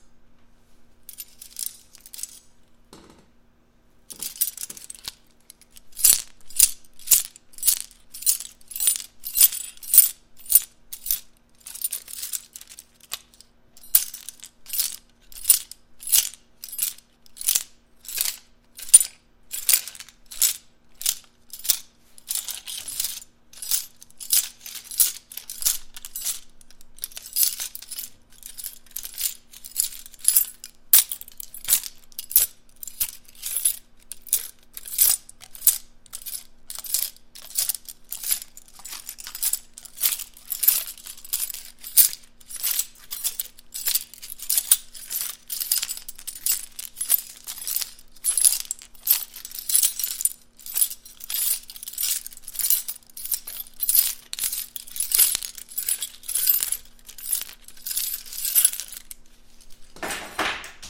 SE MATERIALS metal rattle many items
clang, metal